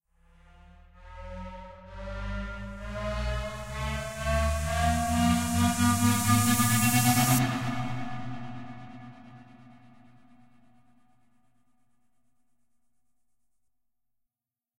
gated riser
a riser i made out of my friend's vocal